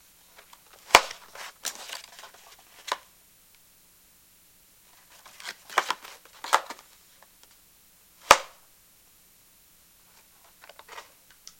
A box of something like cereal being open and closed.